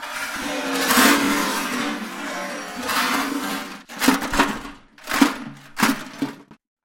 tin garbage bin with objects inside -slowed up the sound and diminished the pitch
canconstruction, crushtin, MTC500-M002-s13factorymetal, work